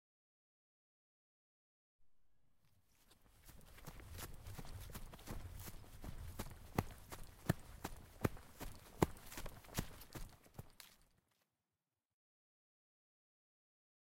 Run - Grass
Running on grass
CZ, Czech, Panska